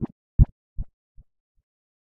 8-bit heartbeat
I was searching the internet for an 16-bit heart sound-effect but couldn't find one, so I made this myself!
This is a slow, low heartbeat made with genuine 16-bit technology!